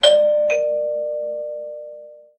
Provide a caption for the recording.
I've edited my doorbell recording in Audacity to (sort of) tune it to an octave from C to B, complete with sharp notes.
bell, bing, bong, chime, d, ding, ding-dong, dong, door, door-bell, doorbell, d-sharp, dsharp, house, octave, ping, ring, tuned